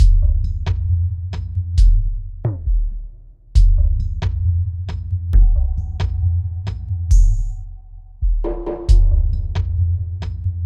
Ambient Groove 005
Produced for ambient music and world beats. Perfect for a foundation beat.
ambient, drum, groove, loops